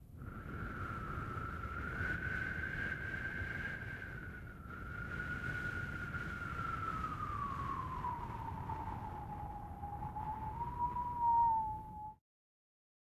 Winter is coming and so i created some cold winterbreeze sounds. It's getting cold in here!